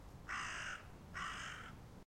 A raven cry.